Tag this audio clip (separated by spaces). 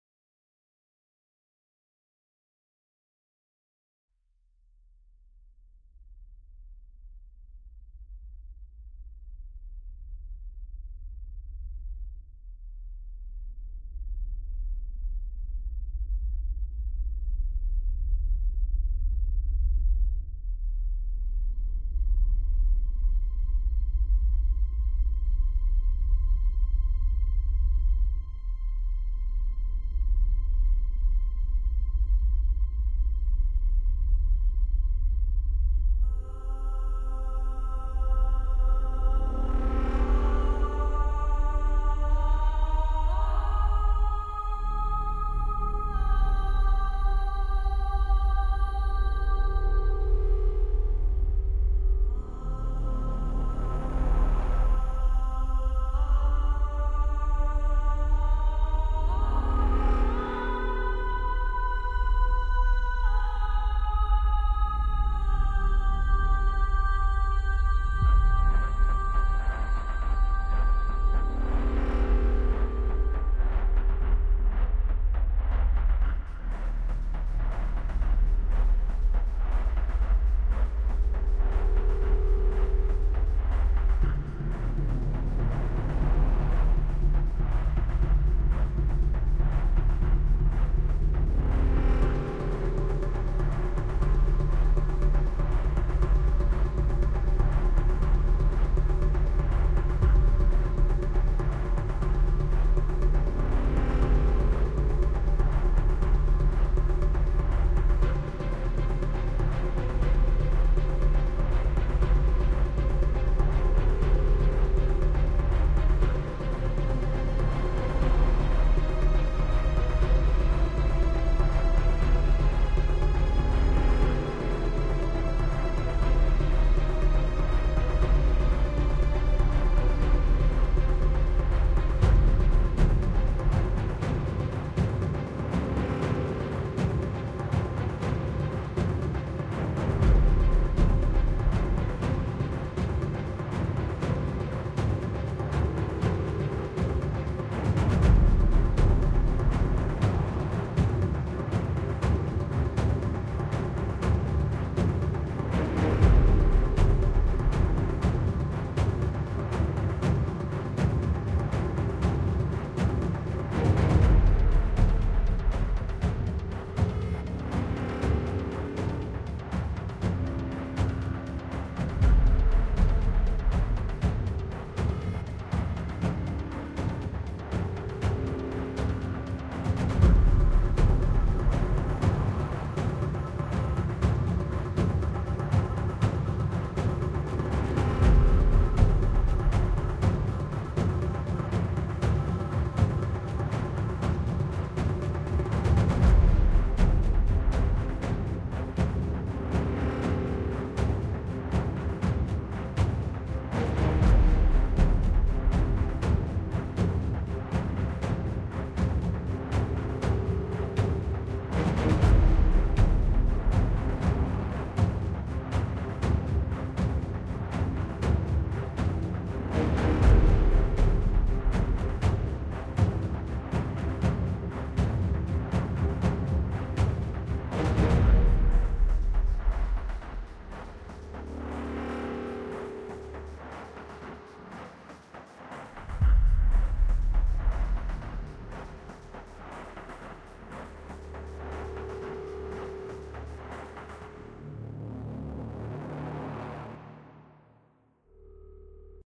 background Drone epic film music orchestra